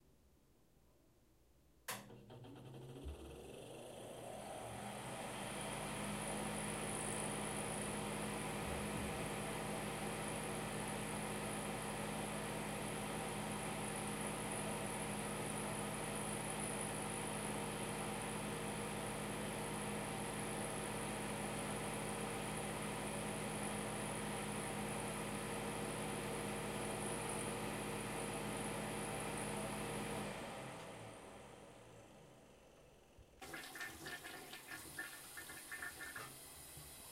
Bathroom fan
bathroom, click, Fan